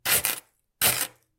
Freezer Ice Stab Knife 02
Stabbing at freezer ice with a knife